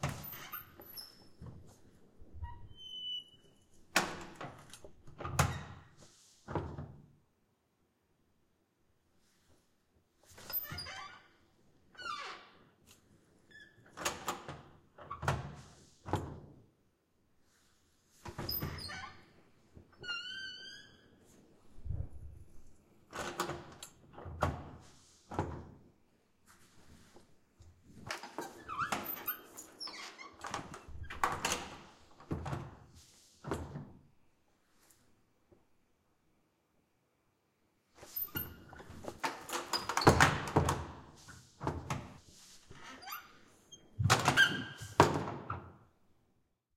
Sound of a heavy glass and metal door opening and closing at a regular speed. Some creaking.